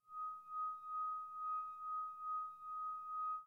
Glass Screech(loop)
Sound made by swiping finger over the edge of a wine glass. LOOPABLE
wine, screech